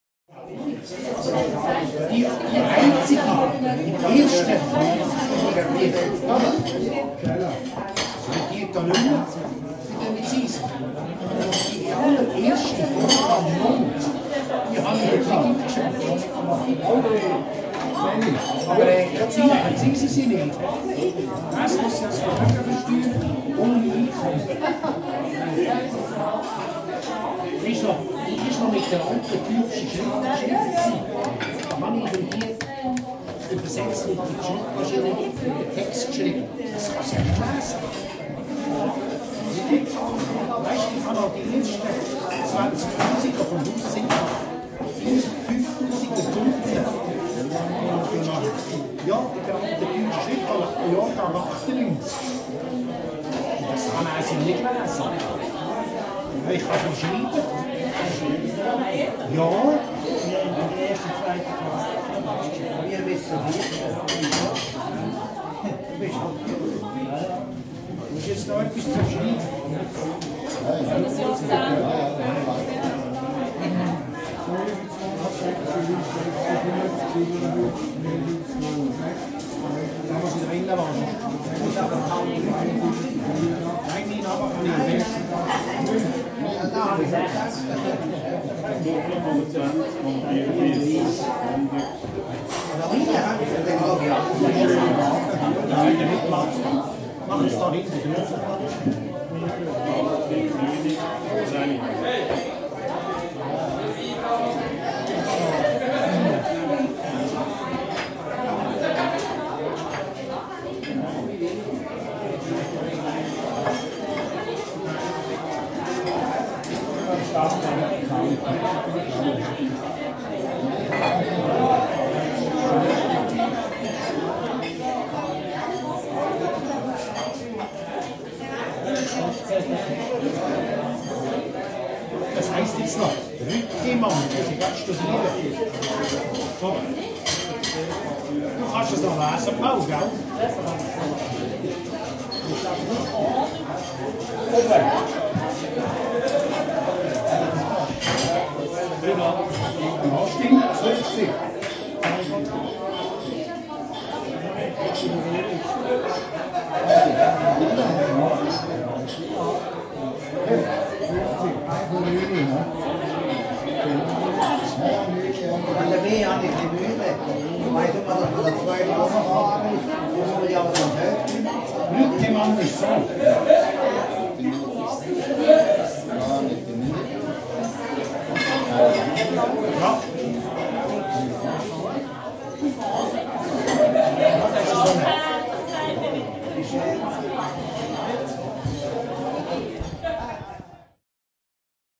Sveitsi, ravintola, miesten puhetta / Switzerland, rastaurant, beerhouse, men talking, laughter, dishes and glasses jangling
Pienehkö olutravintola. Kaljanhuuruista, etupäässä miesten vilkasta sorinaa ja hälinää, saksaa, naurua, lasien ja astioiden kilinää.
Paikka/Place: Luzern
Aika/Date: 01.10.1991
Olut, Soundfx, Yleisradio, Europe, Field-Rrecording, Tehosteet, Astiat, Beer, Eurooppa, Olutravintola, Yle, Finnish-Broadcasting-Company